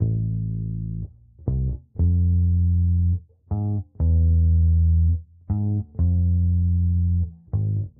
Dark loops 102 bass wet version 3 60 bpm
This sound can be combined with other sounds in the pack. Otherwise, it is well usable up to 60 bpm.
60, 60bpm, bass, bpm, dark, loop, loops, piano